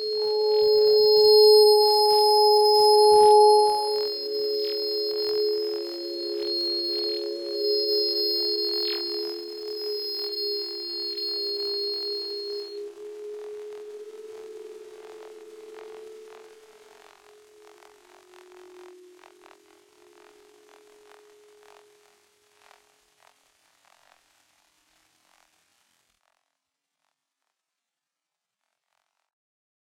made trmp wtr S48 06 RL BEAUTIFUL!!!
trumpet in the sink was the starting point - this is OLD.. 10-12 years old
beat, trumpet, processed